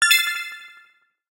UI Confirmation Alert, C5
Experimenting with the Massive synthesizer, I created some simple synths and played various high pitched notes to emulate a confirmation beep. A dimension expander and delay has been added.
An example of how you might credit is by putting this in the description/credits:
Originally created using the Massive synthesizer and Cubase on 27th September 2017.
ui, menu, confirmation, beep, button, gui, interface, alert, game, click, bleep